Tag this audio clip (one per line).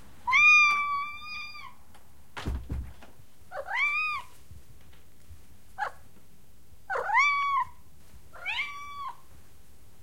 animal
cat
meow
meowing
miauw